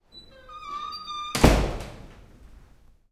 A bathroom door sizzling and slamming.
The sound of the sizzling is bright and the slamming is not too loud because the door is lightweight.
bathroom, slizzing, door, UPF-CS13, close, slam, bathroom-door, campus-upf